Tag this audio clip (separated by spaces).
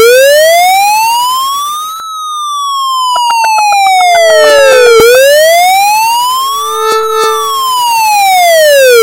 siren warning emergency alert alarm